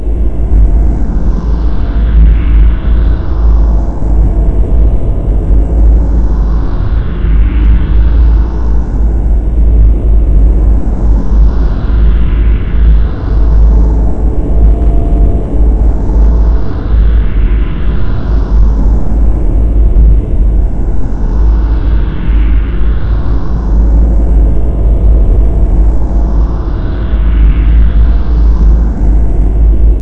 Spaceship hover engine rumble/drone thingy. I can't honestly think of a better description of it. Could also perhaps be used for, say, some sort of alien machinery running or something. It's up to you how to use it.
Made entirely in Audacity.